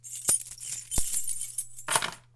Key on wood
Keys hitting a wooden table.
hit, impact, key, metal, sound, wood